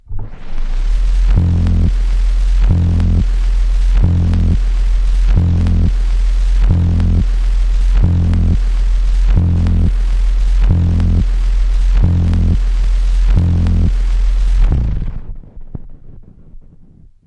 leadout-groove
This is the lead-out of a vinyl record with emphasized bass frequencies.
cracking, cracking-noise, lead-out, leadout, record, vinyl-record